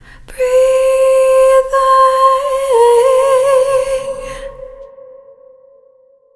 high female vocal singing "breathing"
Me singing "breathing". Compression, eq adjustments and reverb added. 90bpm 4/4 time.
Thank you for remembering to credit to Katarina Rose in your song/project description. Just write "vocal sample by Katarina Rose" in the project description. It's as easy as that!
Recorded in Ardour, using a t.bone sct-2000 tube mic, and edirol ua-4fx recording interface. Added compression, reverb, and eq adjustments. Any squeaking sounds present are only on the streamed version; the downloadable clip is high quality and squeak-free.